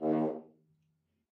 One-shot from Versilian Studios Chamber Orchestra 2: Community Edition sampling project.
Instrument family: Brass
Instrument: F Horn
Articulation: staccato
Note: D#2
Midi note: 39
Midi velocity (center): 95
Microphone: 2x Rode NT1-A spaced pair, 1 AT Pro 37 overhead, 1 sE2200aII close
Performer: M. Oprean